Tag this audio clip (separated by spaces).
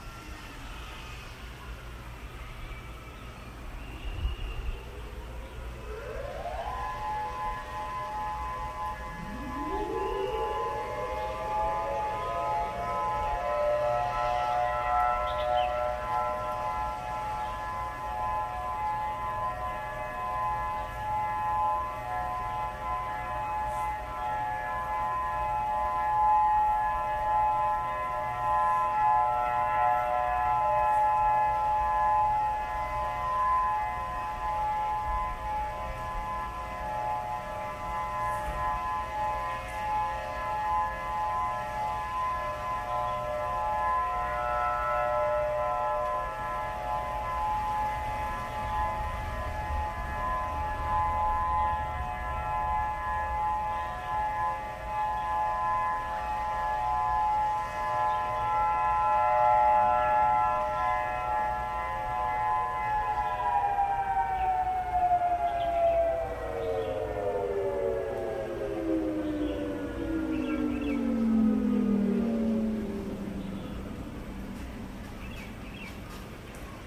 DSA Thunderbolt